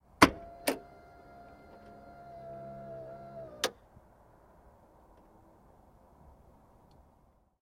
51-2 trunk pops
Lincoln towncar trunk popped. Recorded with cheap condenser microphone onto a portable Sony MD recorder (MZ-N707).
field-recording, electric, car, machine